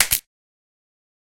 Sounds for a game menu.